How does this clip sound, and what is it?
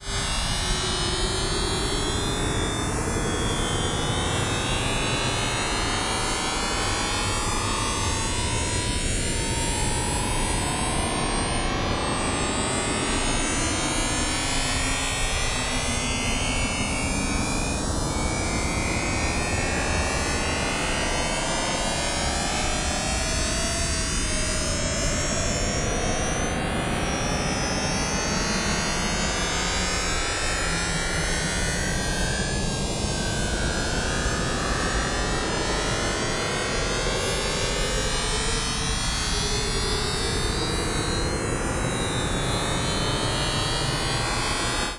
Even more extra dimensional space noises made with either coagula or the other freeware image synth I have.